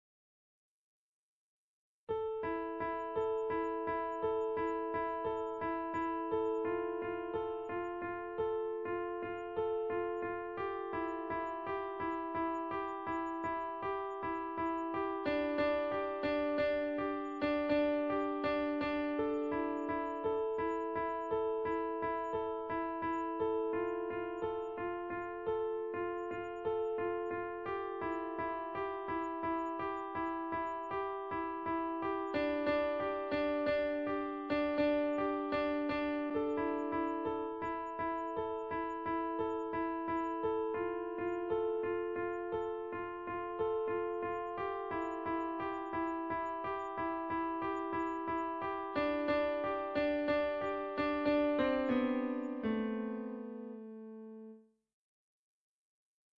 A simple piano melody created for the Music Stock of CANES Produções.
It's an easy-to-edit loop, a beautiful and simple melody, can fit many emotional scenes.
beautiful, classic, clean, improvised, loop, loops, melancholic, music, piano, song